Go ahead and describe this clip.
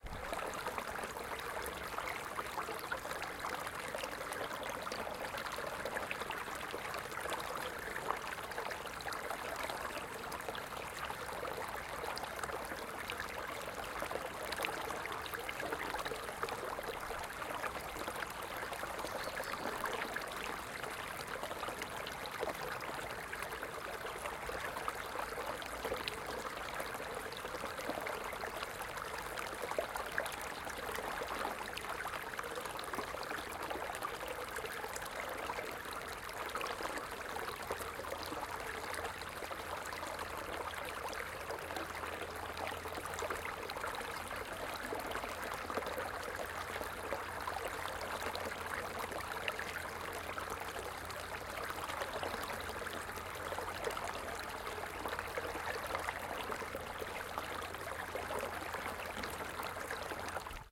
Raw audio of a small, shallow stream for 1 minute.
An example of how you might credit is by putting this in the description/credits:
The sound was recorded using a "H1 Zoom recorder" on 9th February 2016.